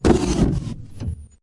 UI, Mechanical, Turning-Off, 02, FX
An artificially designed user interface sound with a mechanical aesthetic from my "UI Mechanical" sound library. It was created from various combinations of switches, levers, buttons, machines, printers and other mechanical tools.
An example of how you might credit is by putting this in the description/credits:
And for more awesome sounds, do please check out the full library or SFX store.
The sound was recorded using a "Zoom H6 (XY) recorder" and created in Cubase in January 2019.
user, down, ui, turning, machine, mechanical, turn, shut, userinterface, interface, off